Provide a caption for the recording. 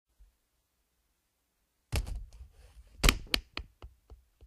putting down suitcase
Suitcase, Luggage, Putting-down